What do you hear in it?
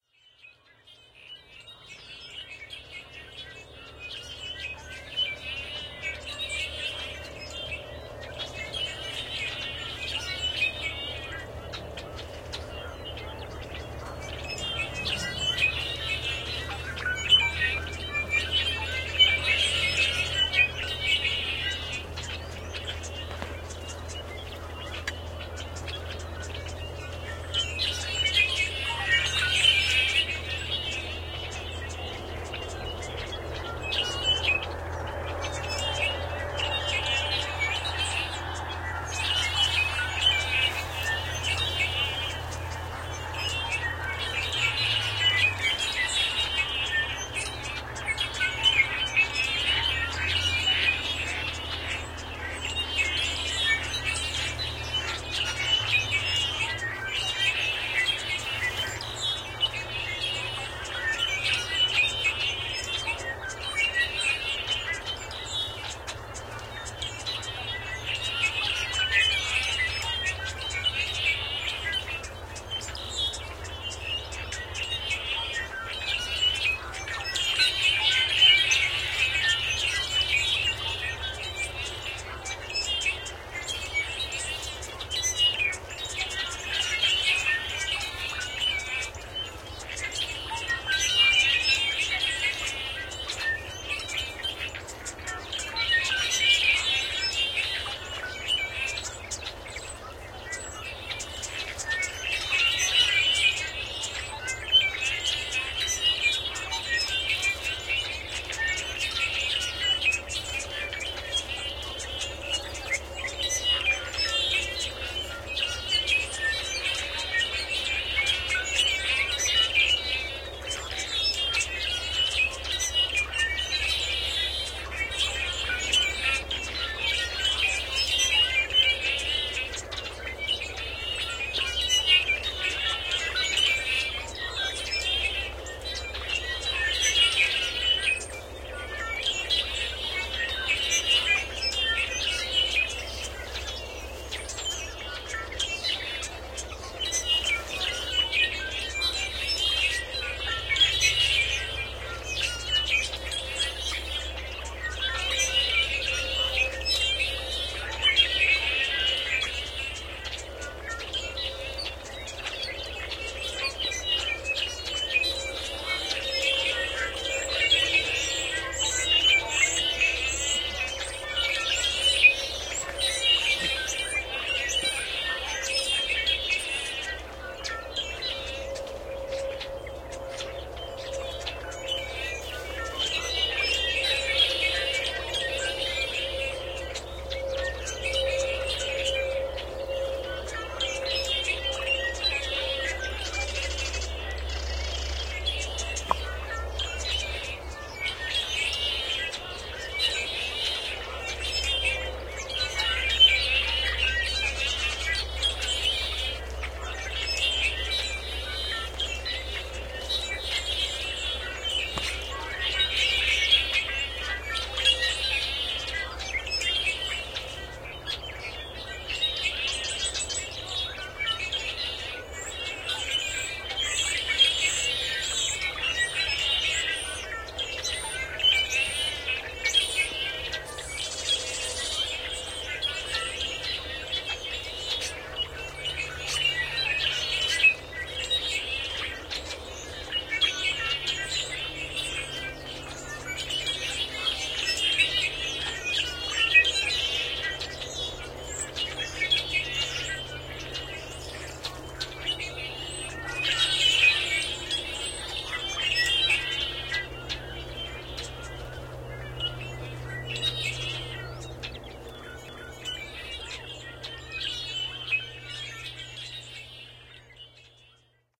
TB1 track03
This recording was done February 27th, 2009, on Sherman Island, California.
sherman-island, blackbirds, california